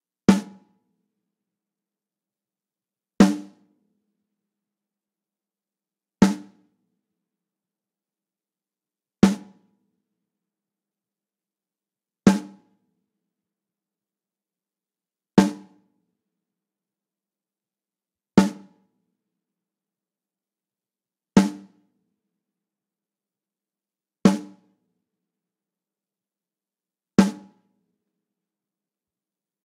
recording of a snare drum